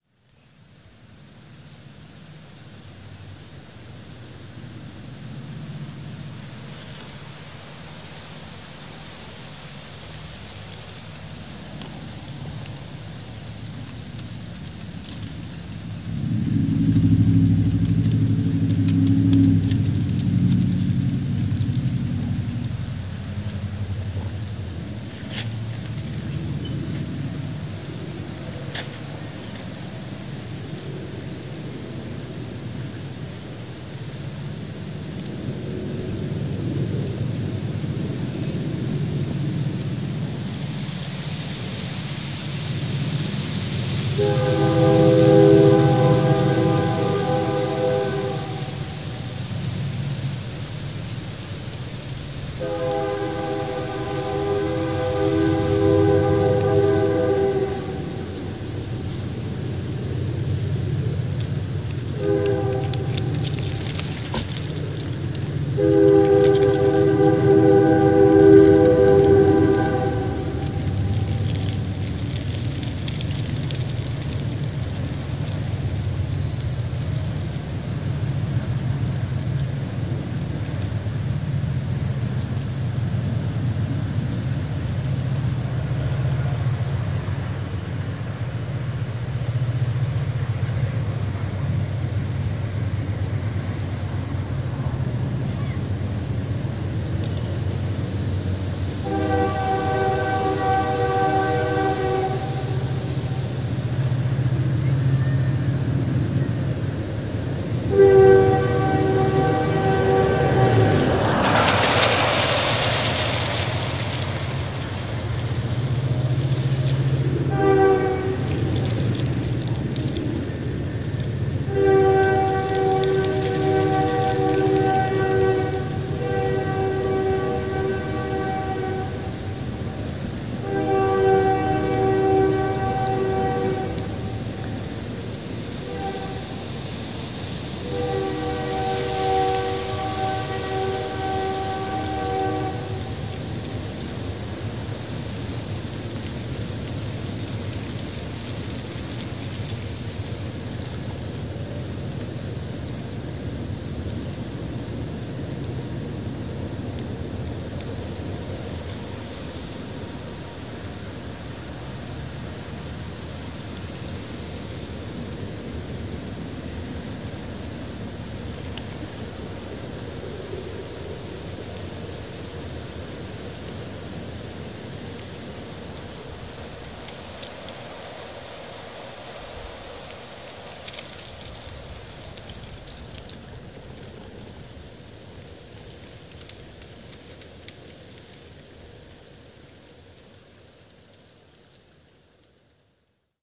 A recording made around 7:30PM at night in mid October of 2012 of leaves skittering across an asphalt street, making that unique, crackly sound. You will also hear a train off in the distance which I think adds to the sound-scape of fall. At 1:51 into the recording a car whooshes buy and with it, a cluster of leaves trailing behind it trying in vain to keep up. .that's always been a wonderful sound sequence for me to hear.
Recording made with my Handy Zoom H4N digital audio recorder using a Rode NTG2 shotgun microphone.
Enjoy